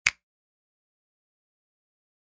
Real Snap 26
Some real snaps I recorded with an SM7B. Raw and fairly unedited. (Some gain compression used to boost the mid frequencies.) Great for layering on top of each other! -EG
finger, finger-snaps, percussion, real-snap, sample, simple, snap, snaps, snap-samples